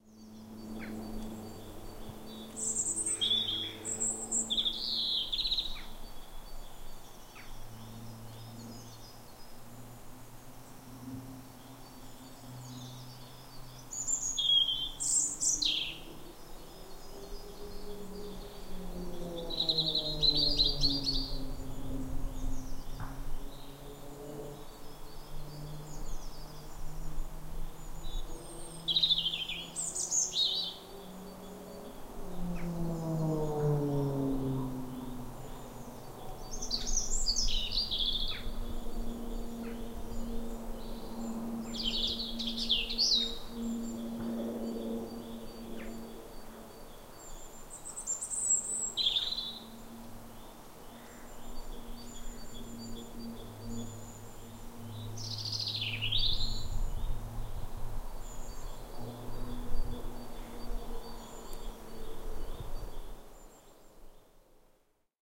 Sk310308 chaffinch plane 2
The sounds of a breezy spring day at Skipwith Common, Yorkshire, England. Many birds can be heard above the breeze but the prominent one is the song of the chaffinch. A light aircraft doing manoeuvres can be heard in the background.
atmosphere, bird-song, bird, aeroplane, chaffinch, field-recording, ambience